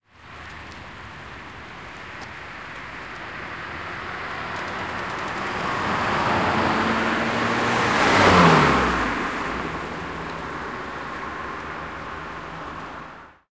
passing cars
cars passing by from both left & right
recorded on Tascam DR-40x
car, engine